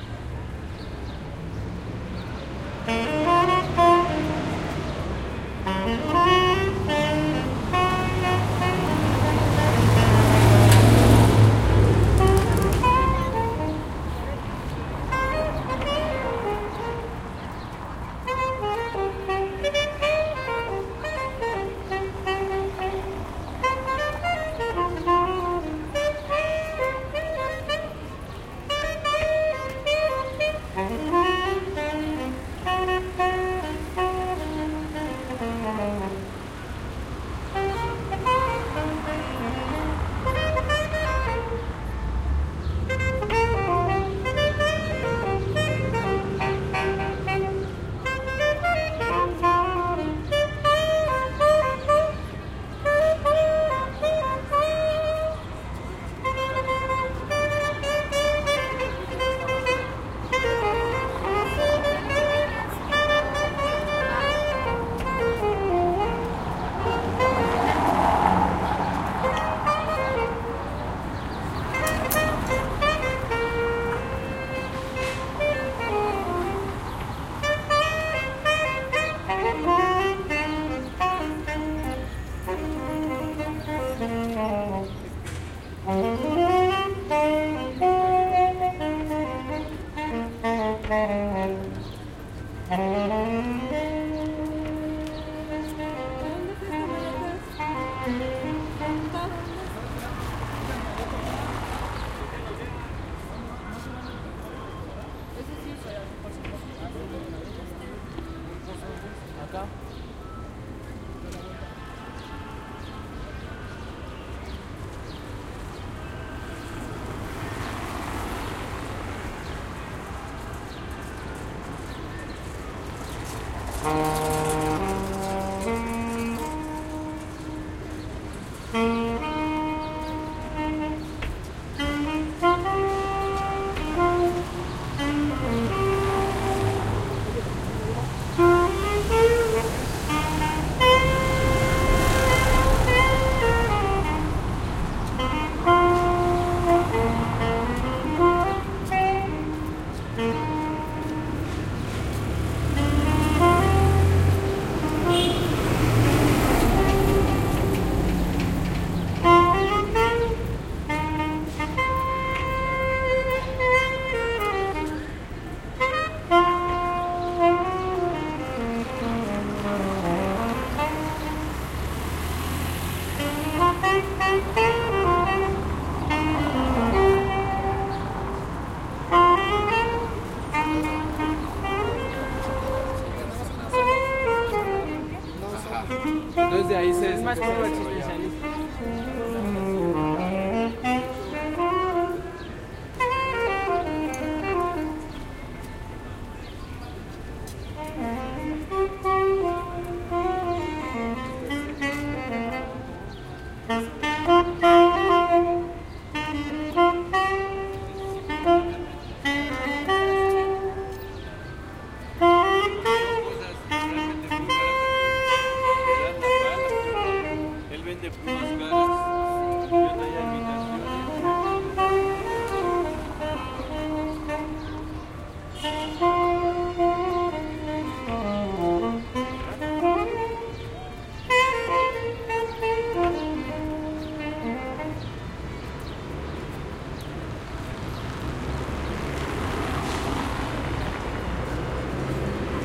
One saxophone player playing Jazz in a streets of Mexico city
player, Saxophoner, Streets